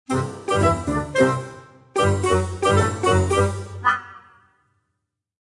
Funny Loop
A short loop made with some free vst plugins in Reaper
games, laugh, music, movie, short, vst